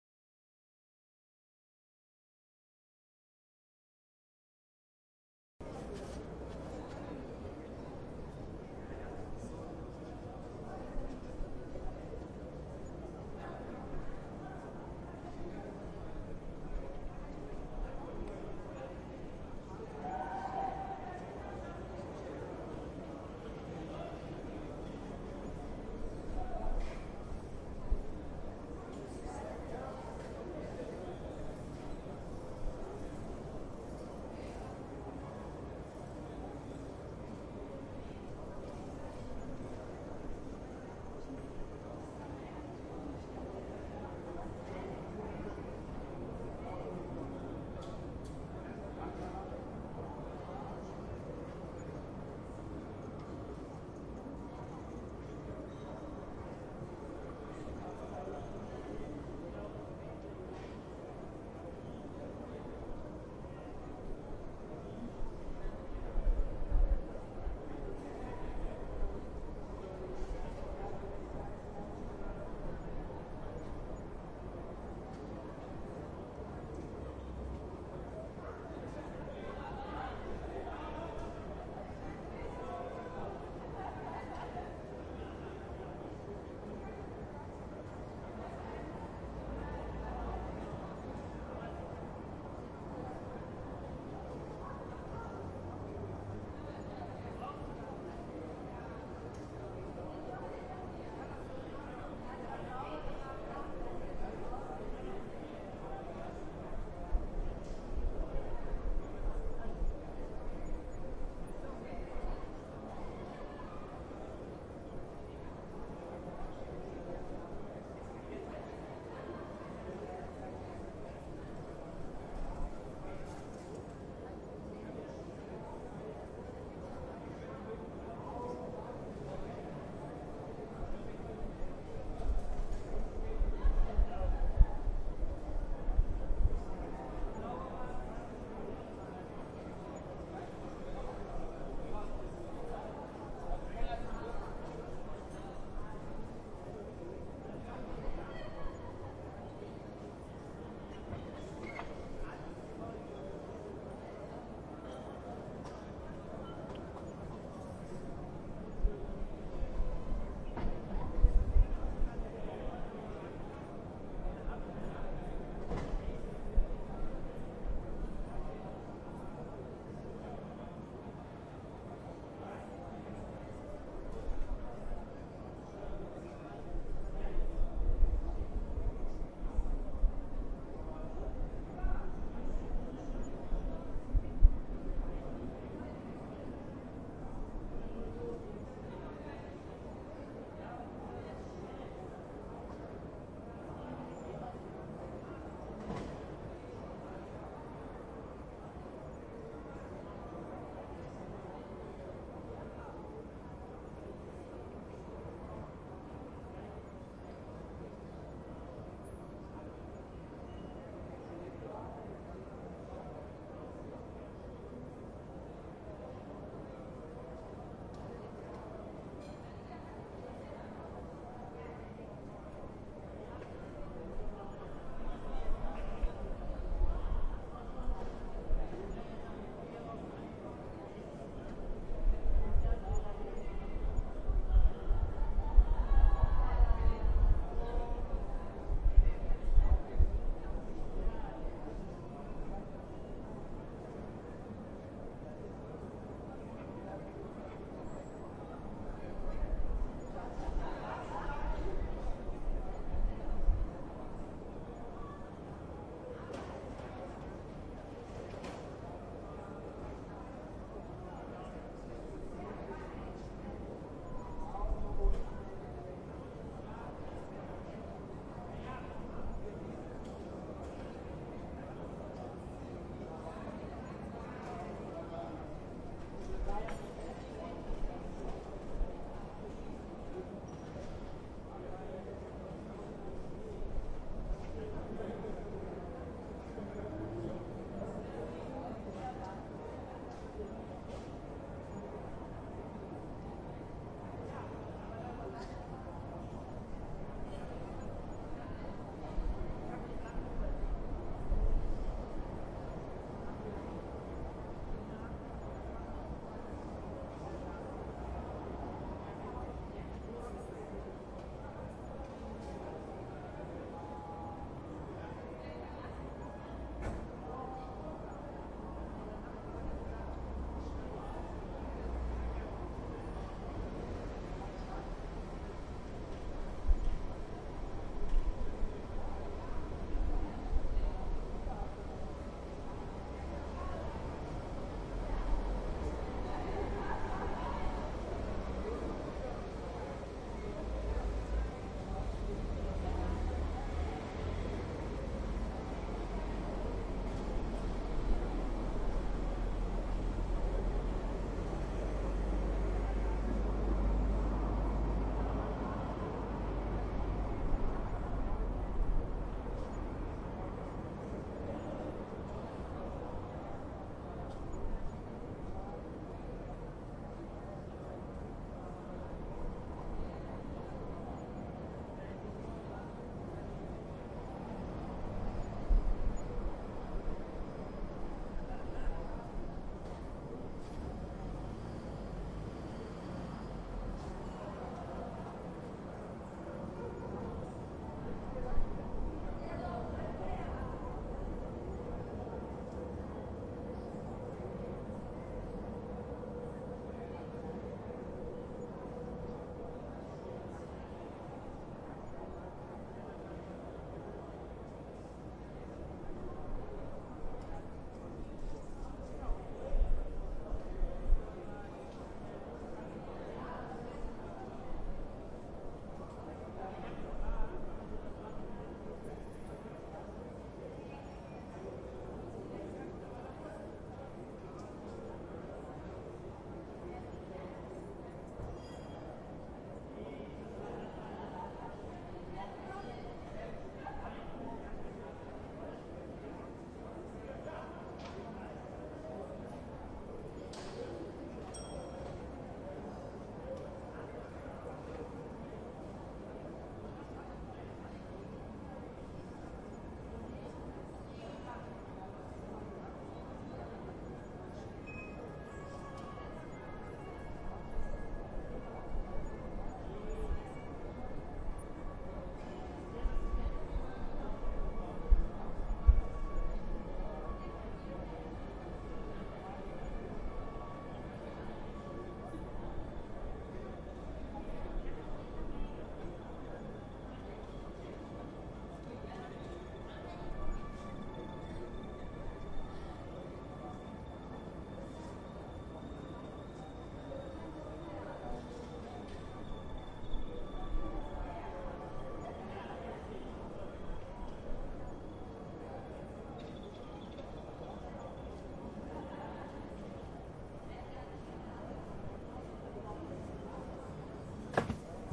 Recorded with a H2 Zoom. An every night crowd @ Admiralsbrücke in Berlin Kreuzberg speaking laughing shouting drinking. Recorded around midnight 22.08.2020